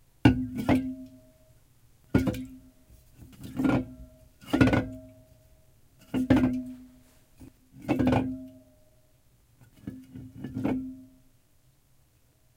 full soda can wobbles 7x
full soda can wobbles on table. Seven Instances Recorded with a Sony ECM-99 stereo microphone to SonyMD.
soda-can, wobble